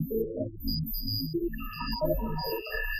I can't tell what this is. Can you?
Calculating space noises made with either coagula or the other freeware image synth I have.
image, noise, space, synth